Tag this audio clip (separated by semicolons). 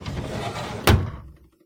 Metal; Home; Office; Household; Drawer; Thud; Desk; Close